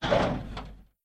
jolt, launches y
launches, y